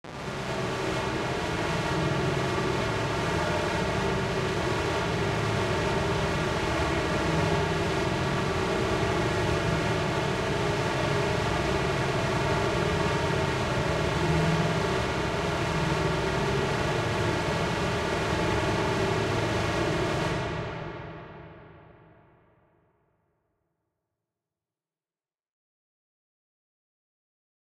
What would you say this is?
strange ambience i made using that noise layer from the LMMS' gameboy emulator with a big amount of reverb